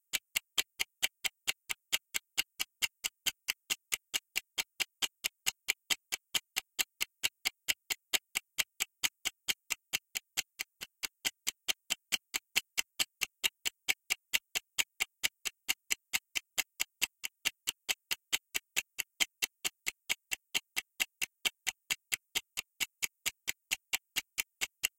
Ticking Timer 25 Sec
Ticking Timer
If you enjoyed the sound, please STAR, COMMENT, SPREAD THE WORD!🗣 It really helps!